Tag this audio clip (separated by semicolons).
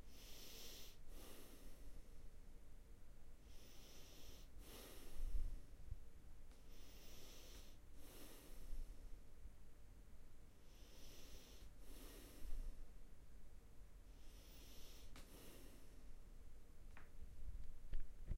calm; male; Breathing; yogaloverswillgobananas; human; nose